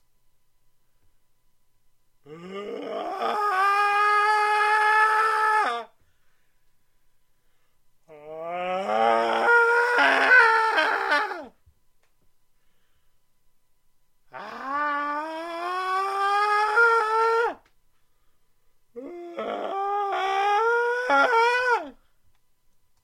Male increasing scream - horror yelling in pain // 4 takes
agony, Male, pain, painful, scream